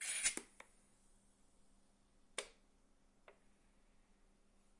Large Monster Energy Drink Lid Turning & Opening
This is the sound of opening of a Large Monster Energy drink by turning its tab after the tape has been pealed back from the top.